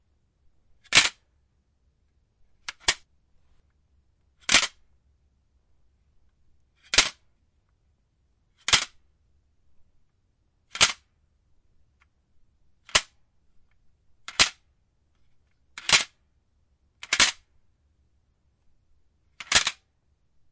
The sound of a CD case falling and hitting the ground. Could also pass for a disk, cassette, cartridge, or other small plastic object.
case, cassette, cd, disk, falling, ground, hitting, plastic